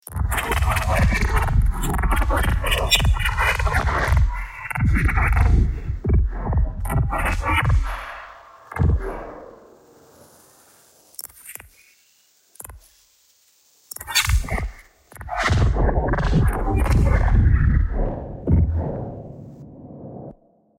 Alien Robot Cries
This sound was originally made from kicks put into a pattern and then sent through an extreme amount of effects chains, one of them being sent through a Sherman Filterbank 2.
cyborg, machines, artificial, robot, robots, robotic, machine, space, computer, mechanical, galaxy, spaceship, droid, sci-fi, aliens, bionic, android, electronic, alien